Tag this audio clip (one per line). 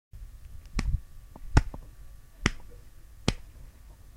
Hitting
punch
hit
2